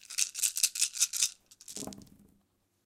A dice roll. Recorded with a Zoom H5 and a XYH-5 stereo mic.
Dice, Die, gamble, gambling, game, games, play, playing, role, Roll, Yahtzee